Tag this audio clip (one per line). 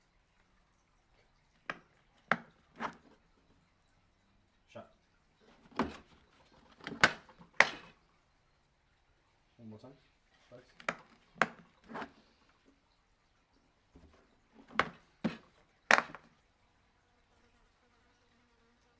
plastic
drop